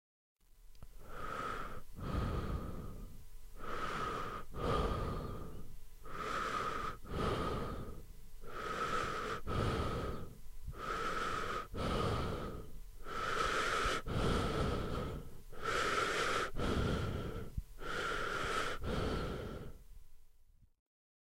breath in and out compr
male breaths in and out
breath-out, breath-in, male